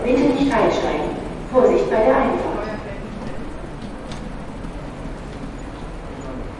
trainstation atmopheric+bitte nicht einsteigen 001
train station platform announcement: "Bitte nicht einsteigen"
rail, platform, railway, field-recording, train, automated, announcement, germany, station